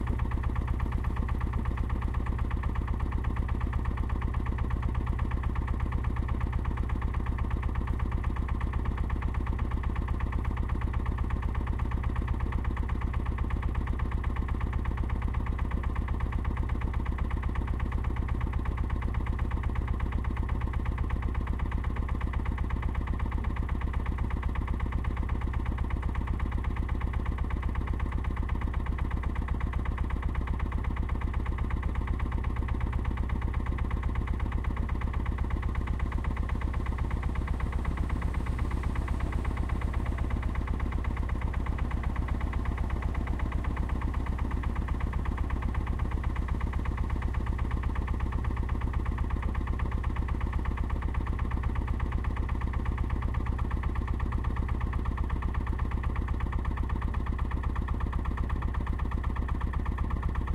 diesel pump stationary ext
diesel water pump.
water, exterior, pump, diesel